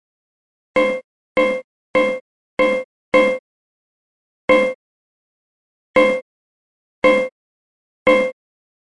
Some plucks with old zither instrument recorded at home, retuned in Ableton.